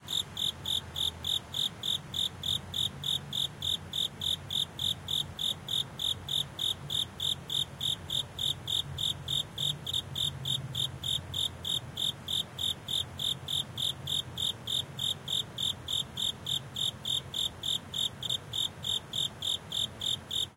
EXT NYC - Cricket in Brooklyn
This is a single cricket next to my building in Williamsburg Brooklyn, NYC. This was recorded at night with some ambient traffic sound. Loud, clear cricket sound.
Recorded with Shure MV88 in Mid-side, converted to stereo. Cricket is slightly off center to the L side.
ambience, city, night, background, BK, field-recording, NYC, atmos, Brooklyn, New-York, ambiance, Cricket, atmosphere, ambient, background-sound, traffic